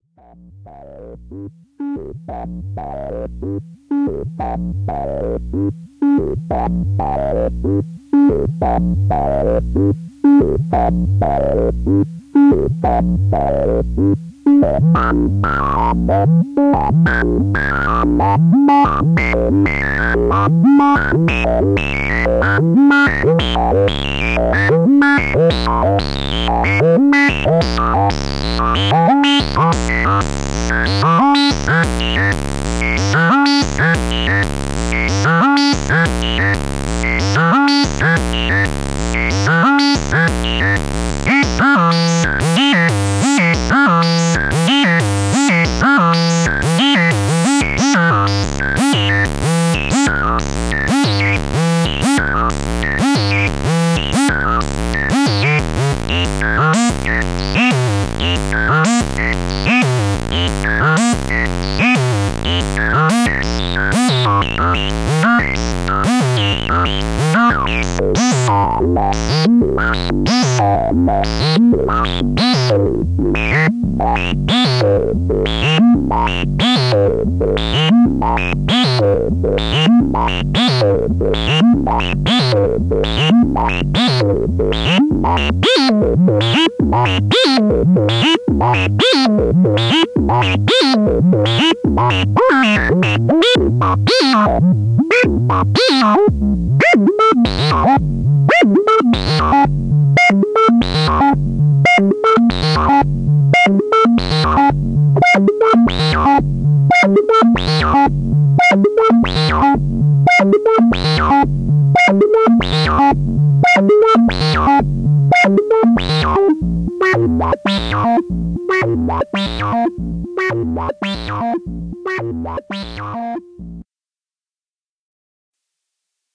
DemonSequence VCO SteinerFilter1
Dirty resonant varied sequence of a single VCO pulse tone through a Steiner low pass filter. The sequence is generated by a custom arduino based sequencer which generates pseudo-random control voltages and triggers. It's quite delightful.